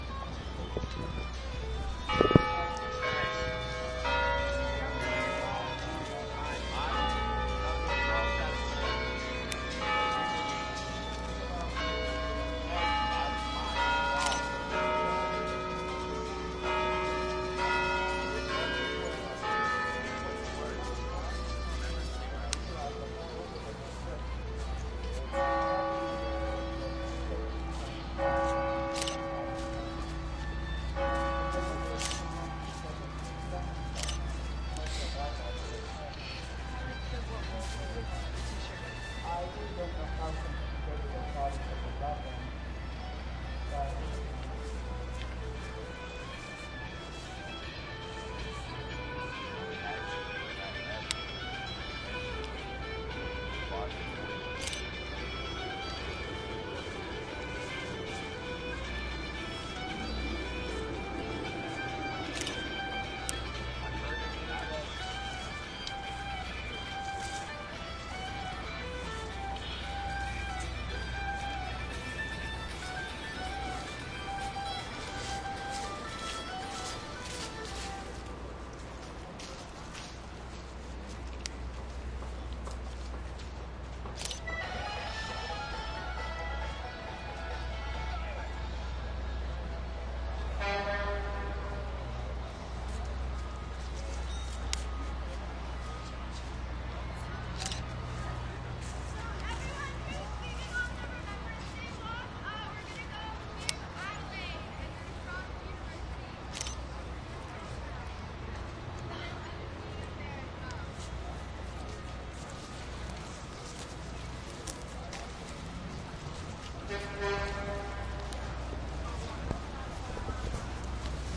Occupy Toronto st james park 11 Nov 2011
Recorded at the Occupy Toronto basecamp in St James's Park, Toronto, right next to the Anglican Cathedral of St James, 11 Nov 2011. At the start of the recording, you can hear the cathedral bells chime. Most of the recording is of the ambient sounds of a walk-through of the park surrounded by the Occupy protesters.
Roland R05 sound recorder with Sennheiser MKE400 stereo microphone.
bells, Canada, field-recording, Occupy-Toronto, St-James-Cathedral, St-James-Park, street-protest, Toronto, urban-sounds